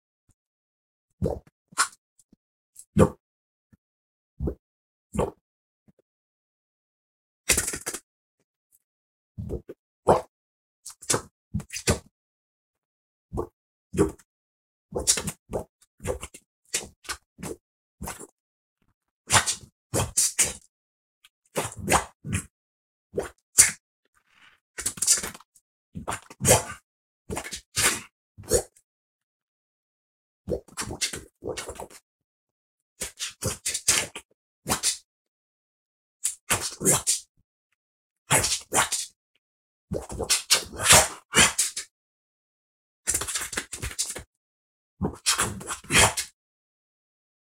Just want some justice for insectoids. Did a couple more insectoid speeches. This one is normal, the other has reverses in it to sound scarier.
•√π÷¶∆°^